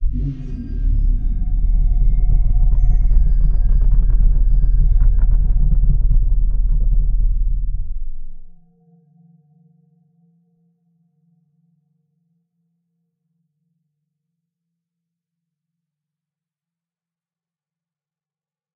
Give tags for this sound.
Growl Guttural Reverb Monster Effect Creature Big Bass

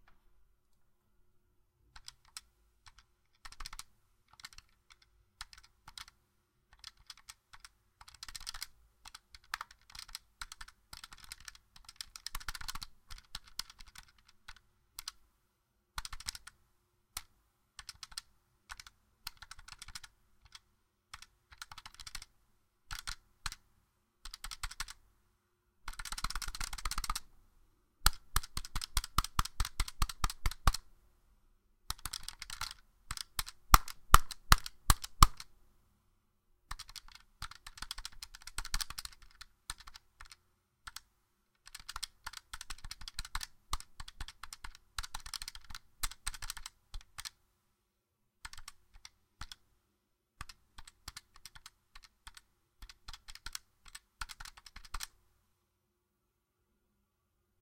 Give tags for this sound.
button buttons click clicking controller foley games mashing press pressing video xbox

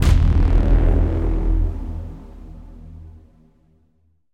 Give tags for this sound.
Huge Cinematic Movie Dark Impact Horror Sci-Fi Hit

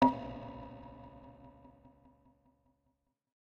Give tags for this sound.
percussion tube

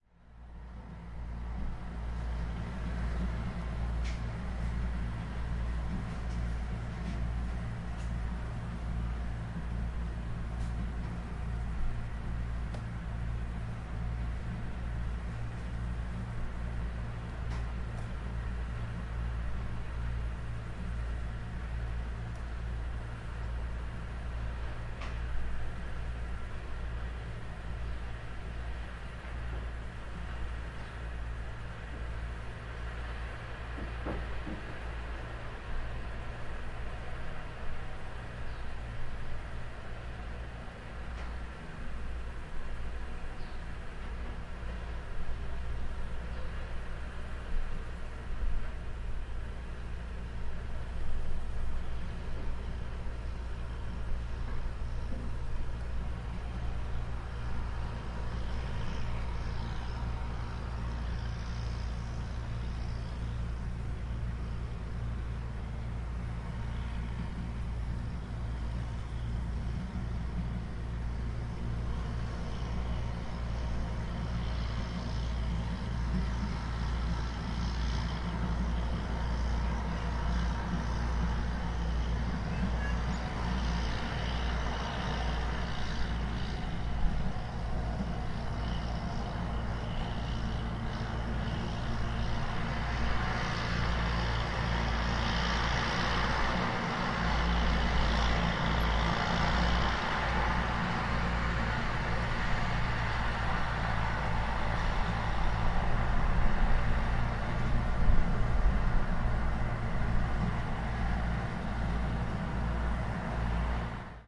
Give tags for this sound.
agricultural soundscape ambience ambient meadow-land field meadow farmland atmosphere landscape field-recording rural fieldrecording agriculture pasture farming-land grazing estate tractor recording meadowland land countryside farming country ambiance

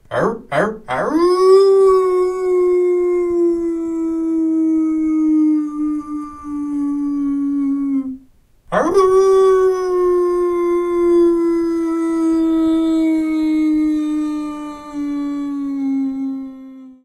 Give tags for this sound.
animal comedic human pretend unreal wolf

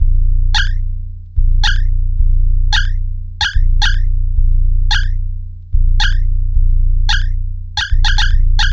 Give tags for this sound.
com bit atari loop game 8

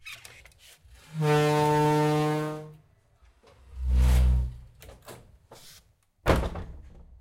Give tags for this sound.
bass big close closing creak crunch door groan shut sing skirr squeak squeaks wooden

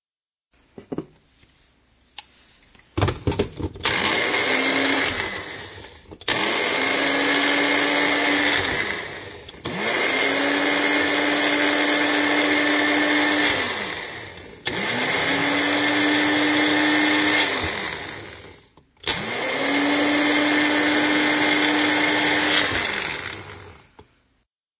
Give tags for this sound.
Microphone Condenser Ice Blue

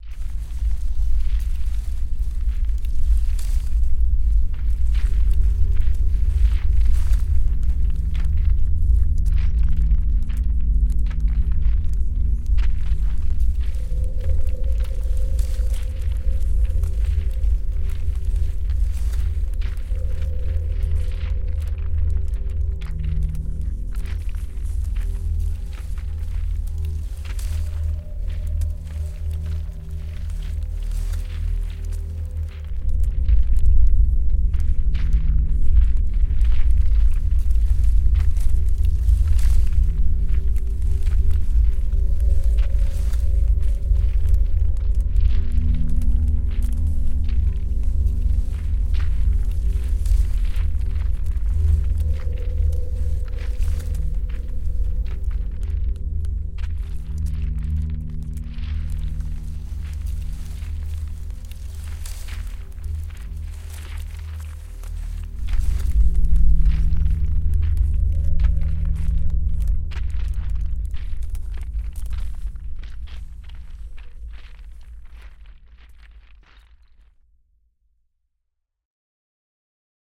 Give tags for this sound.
atmosphere catacombs competition earth inside processed synthetic

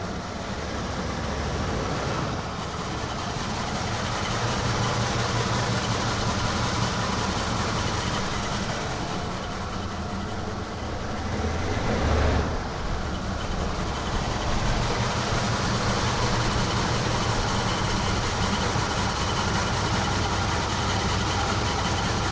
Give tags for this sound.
break cadillac car engine roll squeak

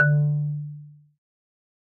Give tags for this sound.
marimba percussion

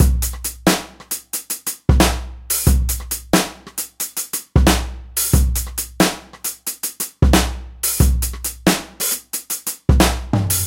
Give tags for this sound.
Acoustic Bass Beat Drum Drums Funk Hat Hi Hihat Hip Hop Jazz kick loop Rap Shuffle Snare Trip

